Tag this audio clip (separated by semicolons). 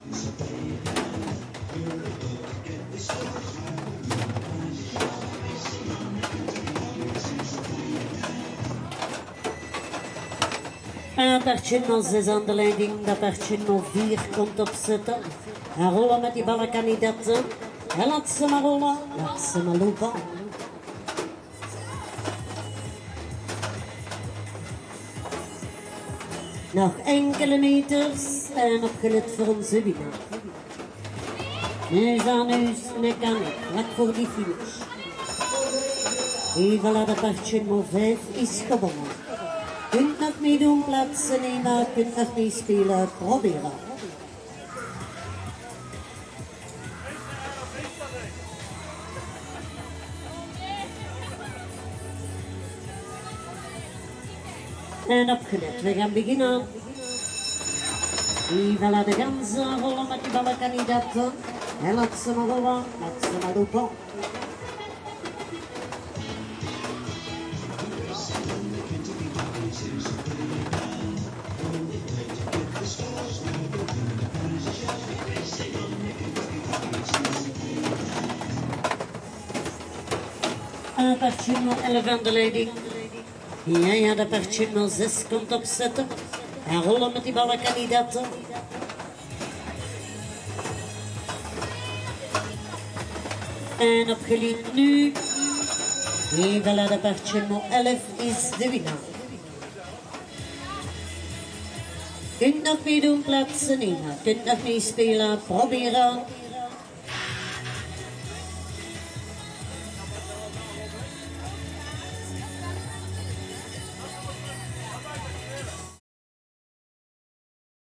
city; ambience; outdoor; fair; Holland; general-noise; pa; soundscape; host; games; dialect; atmosphere; crowd; background; ambient; ambiance; mechanical; game; speaker; field-recording